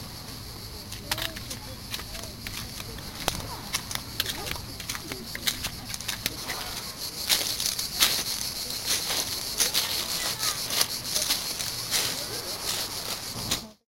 transition walk
From summer 2008 trip around Europe, recorded with my Creative mp3 player.Walking out to the beach in France
beach, footsteps, walking